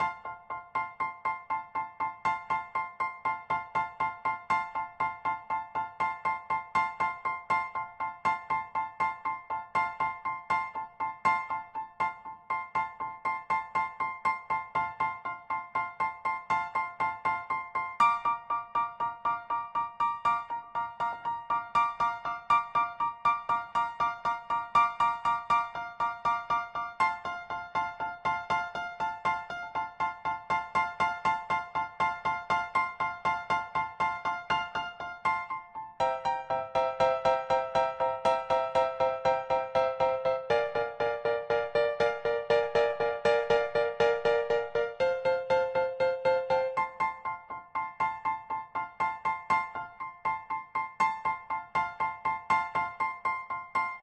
Song5 PIANO Fa 3:4 80bpms
80, beat, blues, bpm, Chord, Fa, HearHear, loop, Piano, rythm